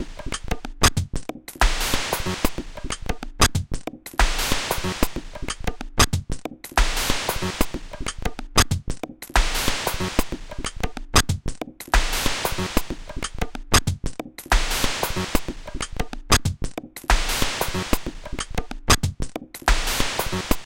dead funk
beat, harsh